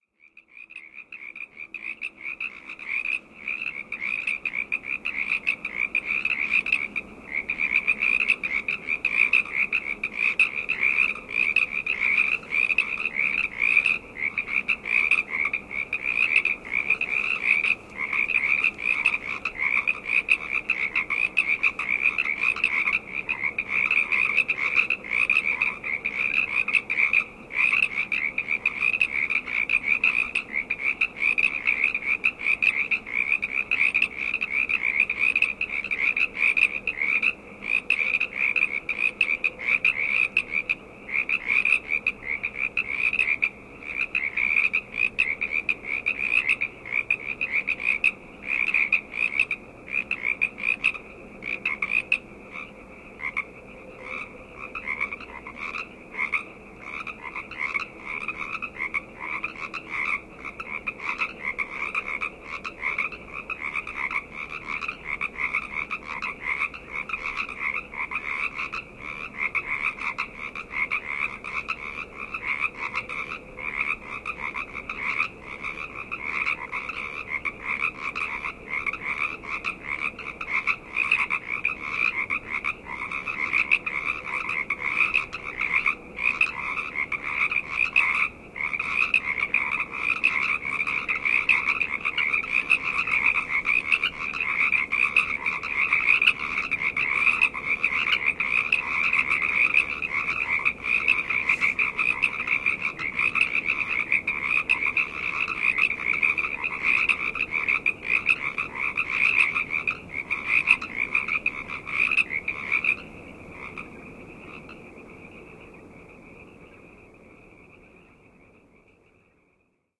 sherman frogs 06 04mar2010
Recorded March 4th, 2010, just after sunset.
frogs, california, sherman-island, ambient